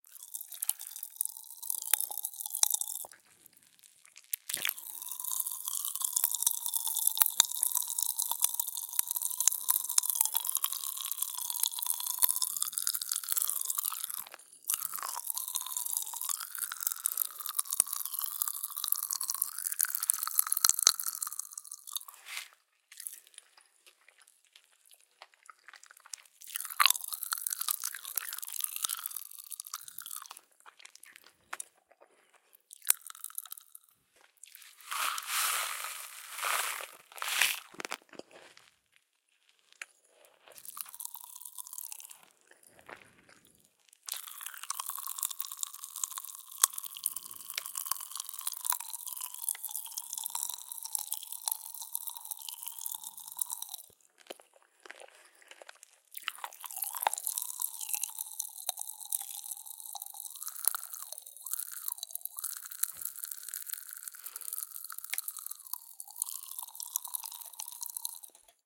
Crackling Chewinggum in a mouth of a child. More pouring out of the paperbag into the mouth at the middle of recording with chewing. Different mouth formants.
Without compression.
Only cut low end at about 500Hz to take away a little bit of breathing and denoised some backgroundnoise for more direct sound.